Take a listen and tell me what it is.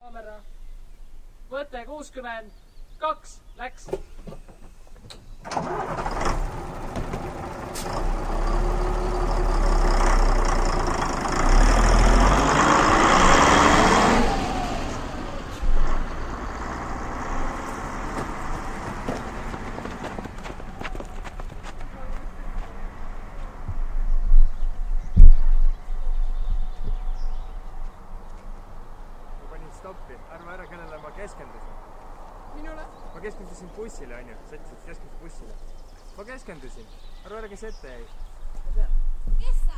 Bus starting engine and driving away.
bus, camera, engine, motor, movie, talk, truck